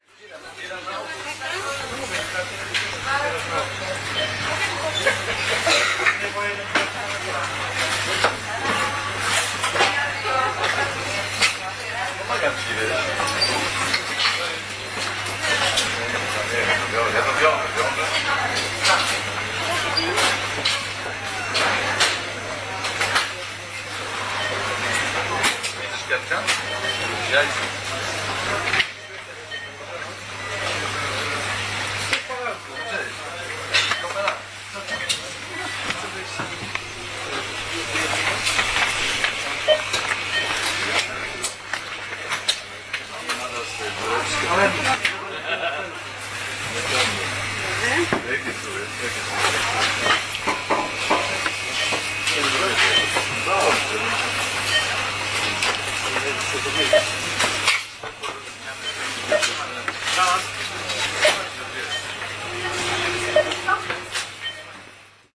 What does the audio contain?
PP supermarket 011010
01.10.10: about 21.00 in Piotr i Pawel supermarket in Stary Browar on Polwiejska street in Poznan. two friends meet after long time no see. sounds of their voices, hubbub, beeping a light pen.